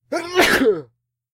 Sneeze, Single, D
Raw audio of a single, quick sneeze. I had the flu, might as well make the most of it.
An example of how you might credit is by putting this in the description/credits:
The sound was recorded using a "H1 Zoom V2 recorder" on 19th November 2016.
cold, flu, ill, sick, single, sneeze, sneezing